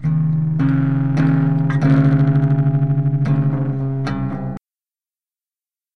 lofi guitar
note, lofi